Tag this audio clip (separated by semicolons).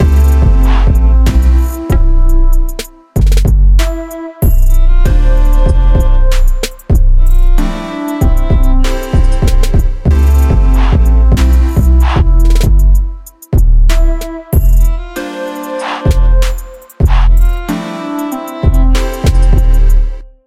hiphop; discarded; trap; drum; hip; beat; future; bpm; drums; loop